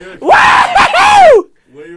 whoo ho ho- Enjoy!
author, joy, labs, over, comedy, josephson, humor, cartoon, book, microphone, laugh, voiceover